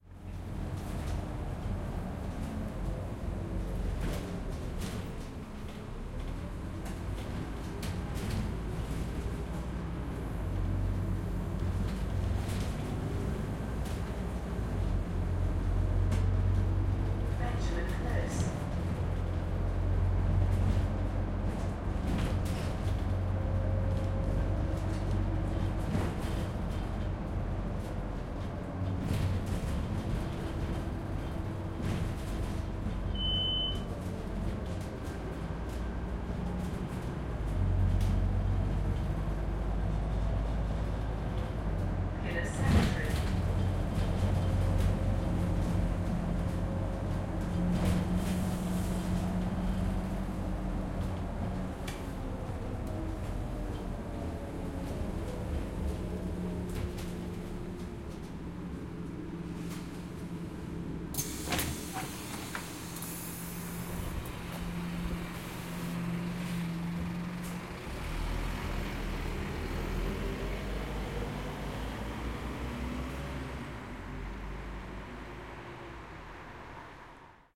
Bus Int Transit Pull Up Doors Exit Onto Street The Bus Departs
Bus, Internal, Street